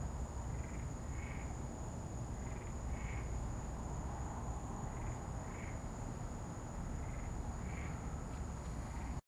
The frogs and insects at night recorded with Olympus DS-40 with Sony ECMDS70P.
frogs, field-recording, insects